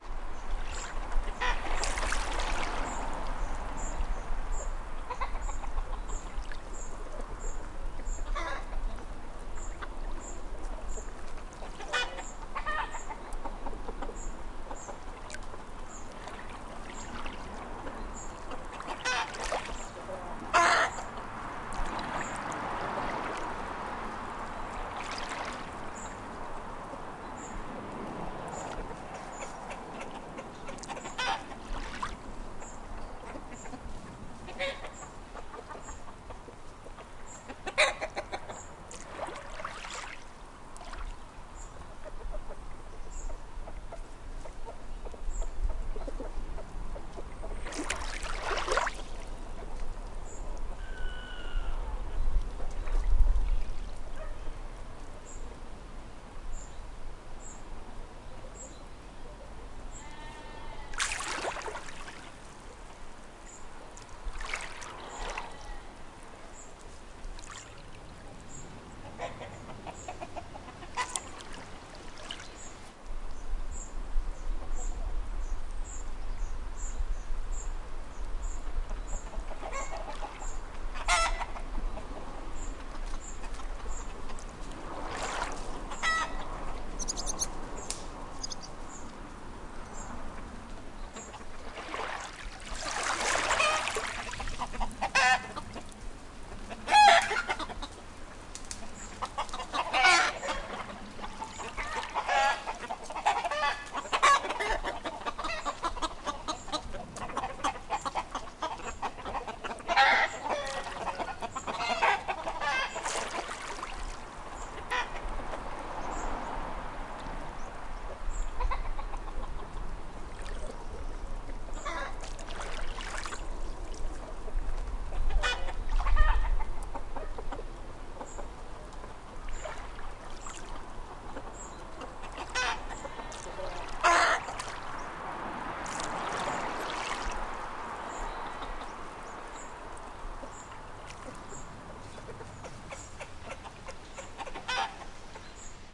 Glenuig 3pm Thursday, sheep, birds and the Lochailort Post Office chickens.